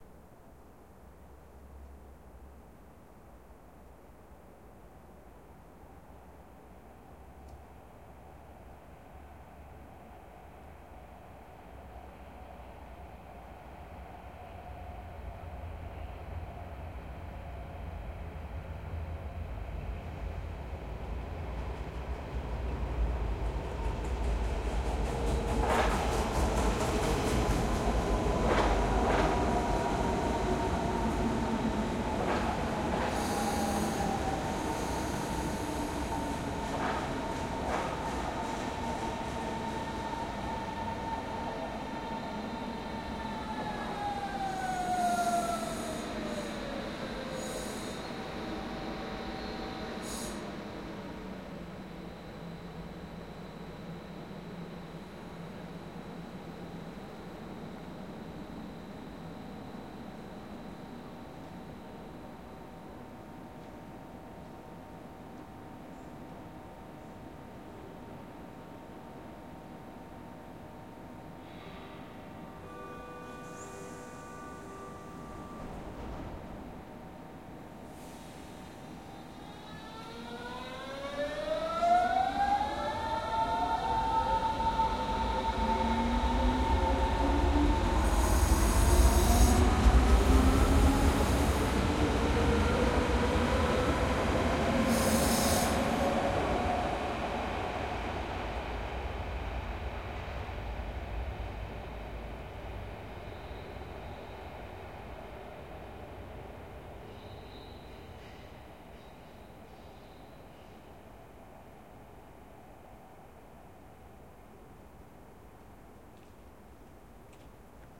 S-Bahn Berlin - Train arrives at station, waits, departs, perspective from the street below
S-Bahn train arrives at station (built on an elevated train track), waits and departs prespective from the street below.
announcement, arrival, arrive, arriving, Berlin, depart, departing, departure, platform, rail, railway, railway-station, S-Bahn, train, trains, train-station